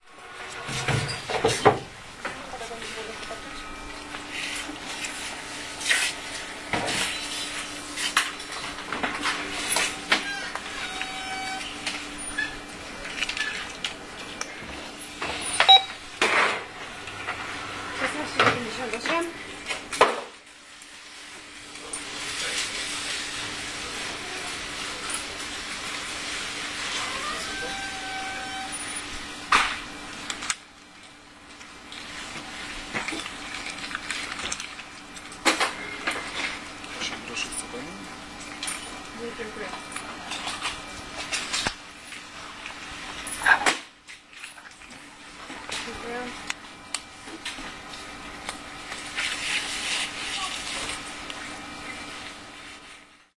cash desk in Lidl 180910
18.09.2010: about 20.30 in Lidl supermarket on Fabryczna street in Poznan. The sound event of paying for shopping. Audible are: beeping of light pen, voice of cashier, packing of shopping